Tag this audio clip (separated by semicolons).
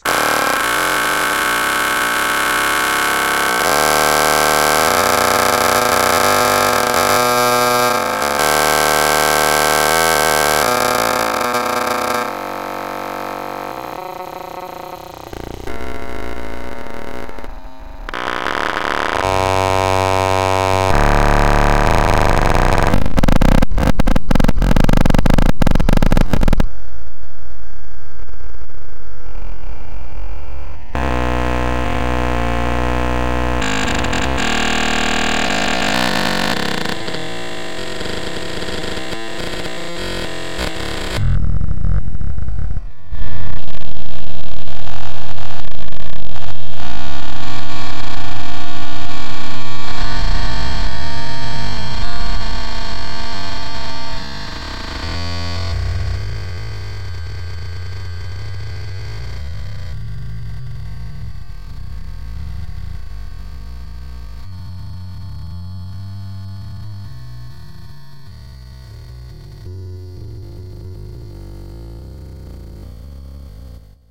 microphones; data; solenoids; computer